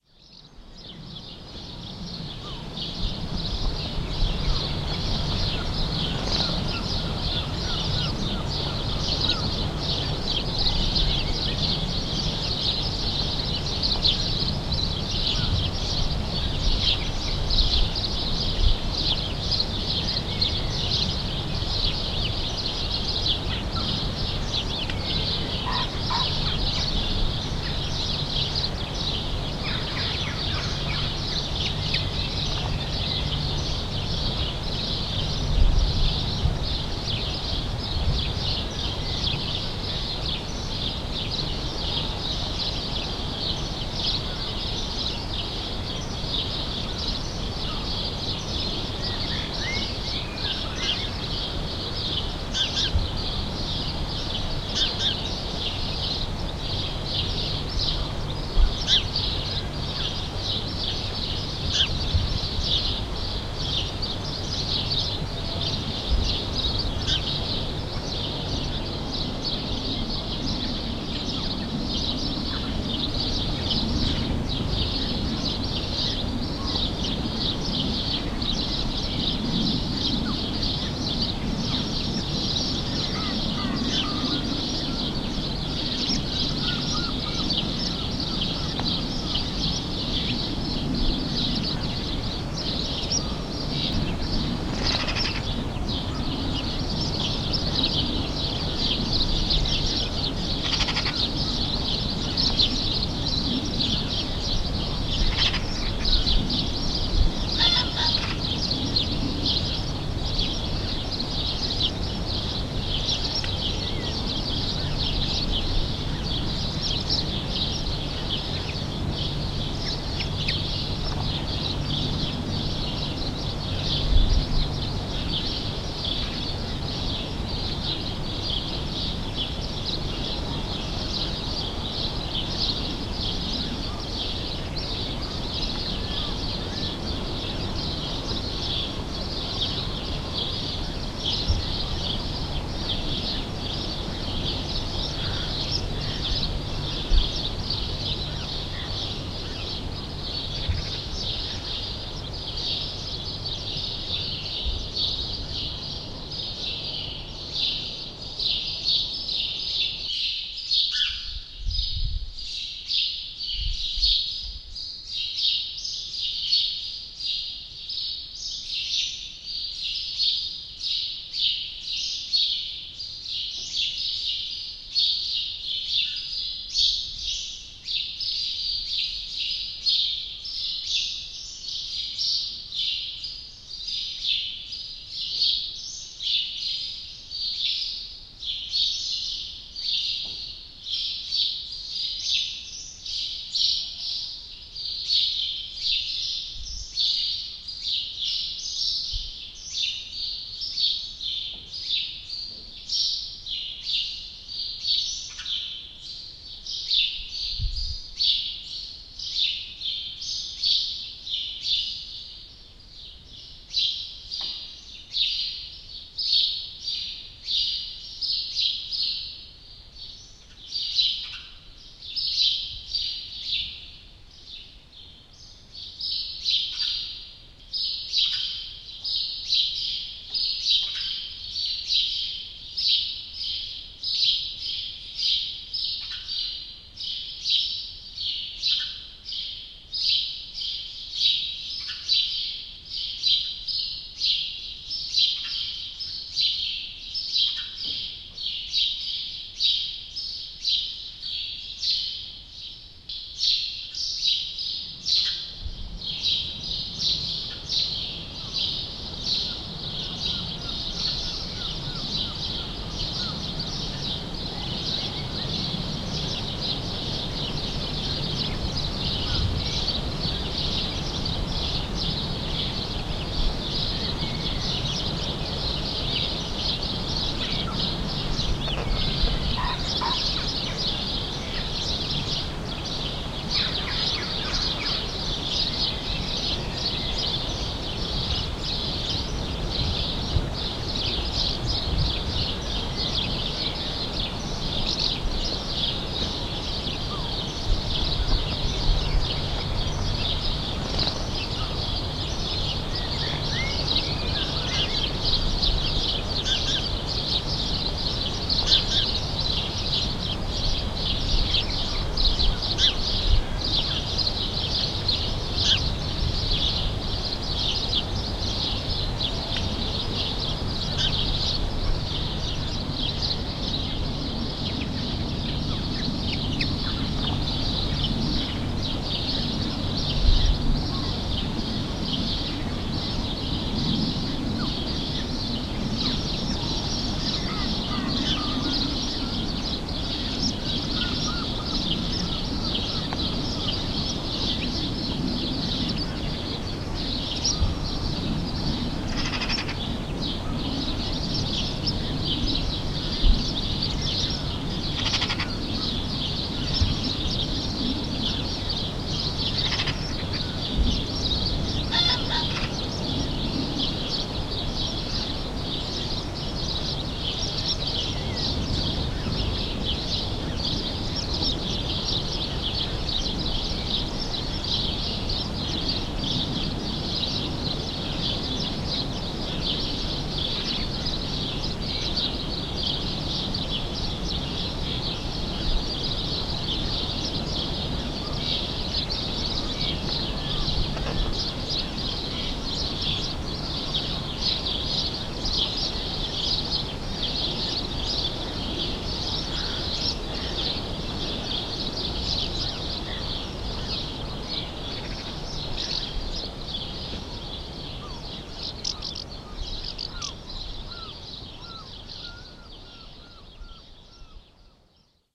02.Sparrows-in-a-Barn
Recording of sparrows in and outside the barn. Seagulls, crows and pheasant in a background. Sound fades to the inside of the barn in the middle of the track and then fades out again to the a outside.
barn, birds, birdsong, field, field-recording, sparrow